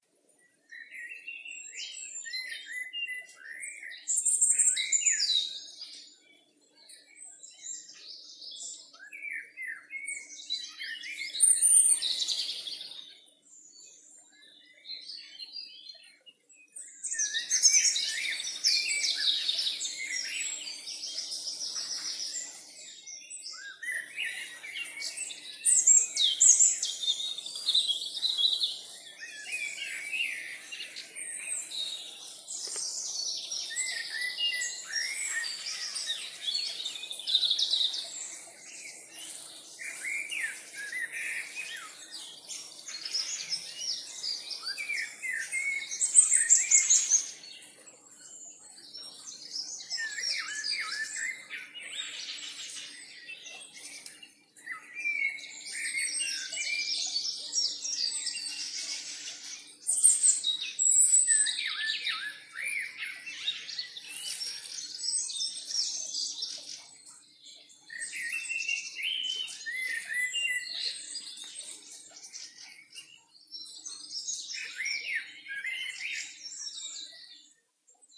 Birds singing in the evening forest